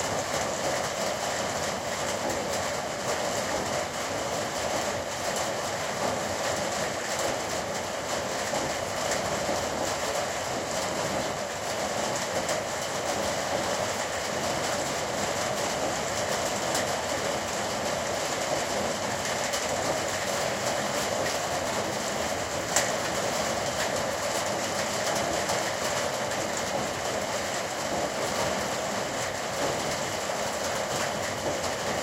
Heavy Rain in Croatia
Rain, Weather, Storm